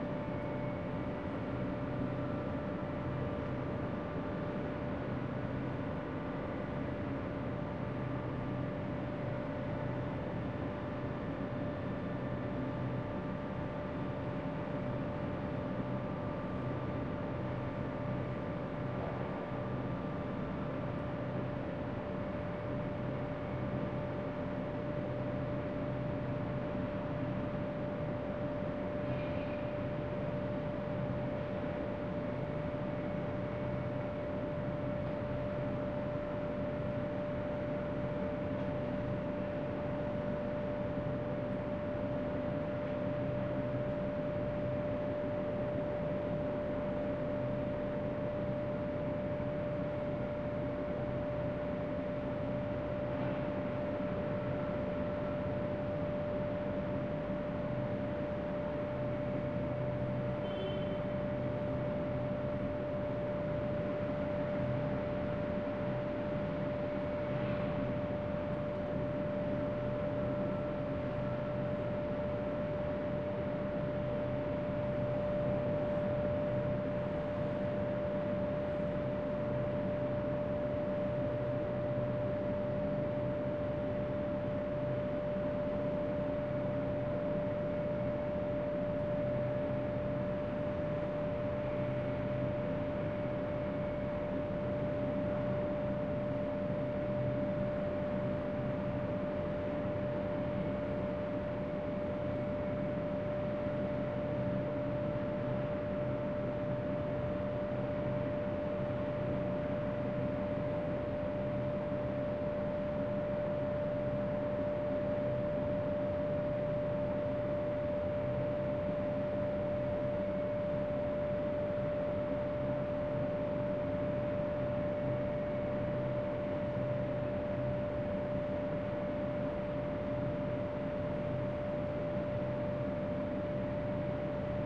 Room Tone Office 12
Ambience, Indoors, Industrial, Office, Room, Tone